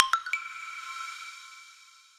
Ausgang Outgoing Entry Confirm Chat Xylophone
Made with Bitwig Studio. Instrument: Xylophone. FX: Reverb.
Ausgang, Sound, Handy